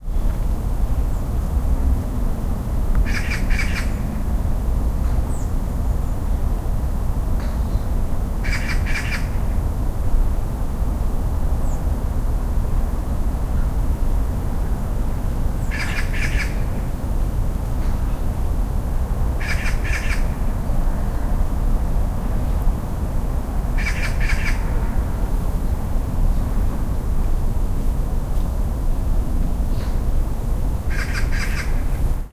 Birds and golf and traffic in the background.
20120116